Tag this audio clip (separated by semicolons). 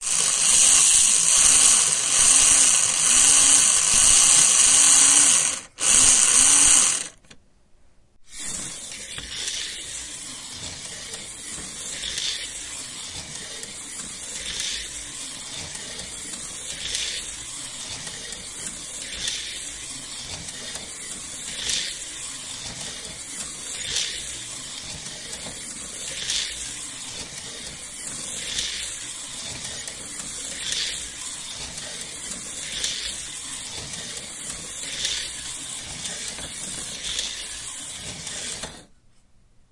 1960s; electric; ho; scale; slot-car; toy